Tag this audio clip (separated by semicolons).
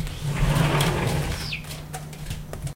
bedroom; closed; door; foley; glass; noises; sliding